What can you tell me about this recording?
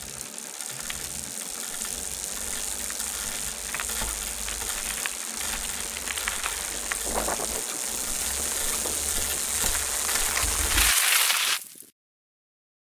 Mountain Bike Braking on Gravel